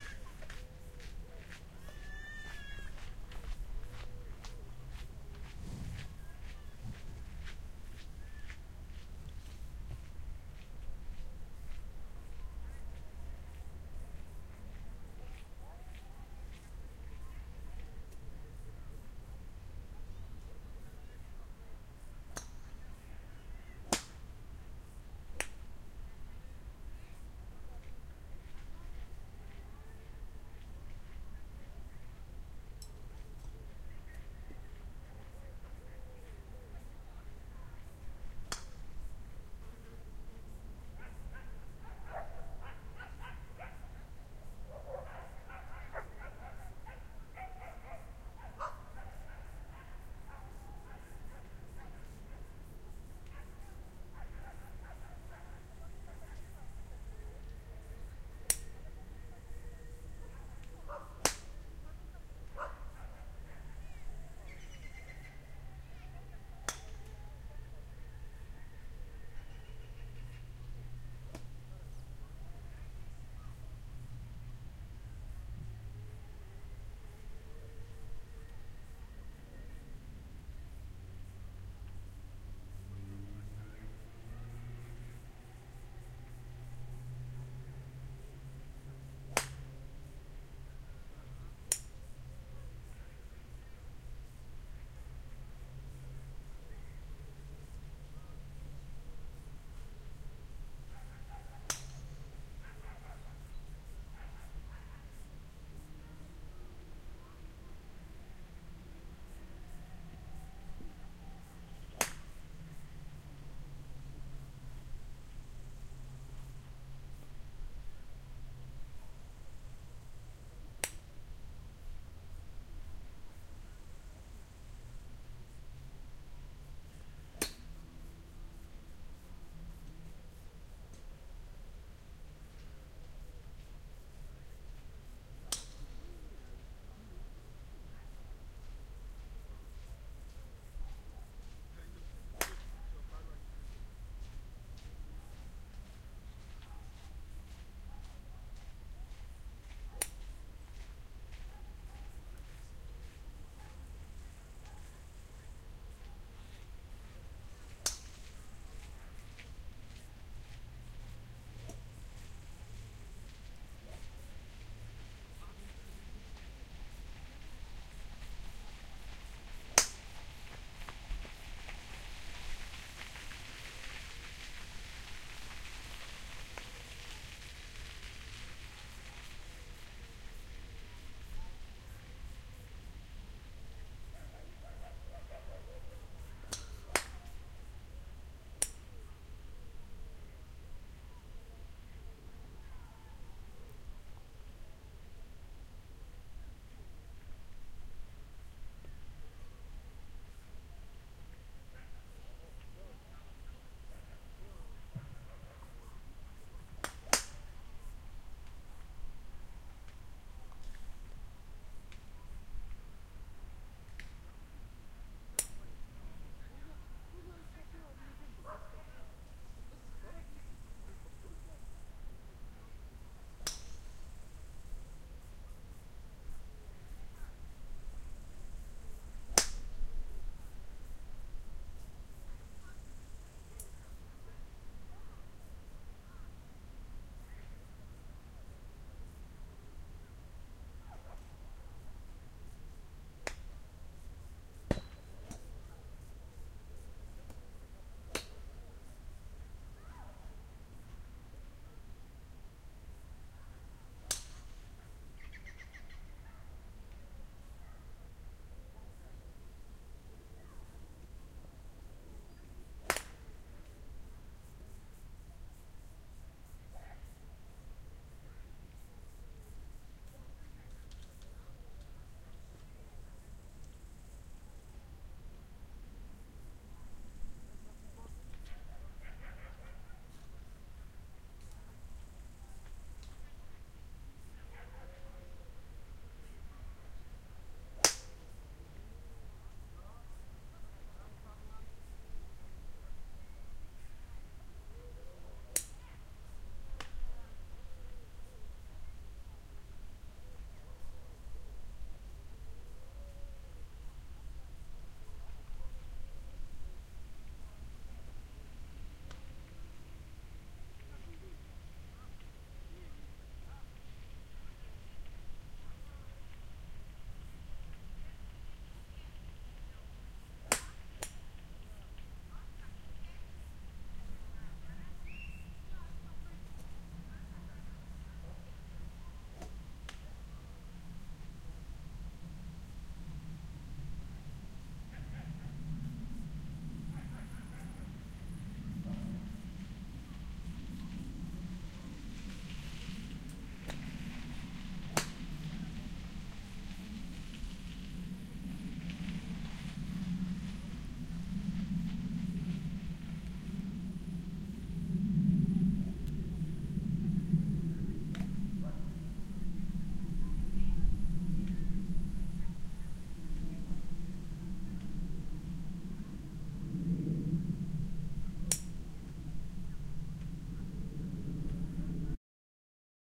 City Golf Wroclaw
10.06.2016, Wroclaw, Golf Club,
Zoom H1 + EM172
Wroclaw, Golf, Grabiszynski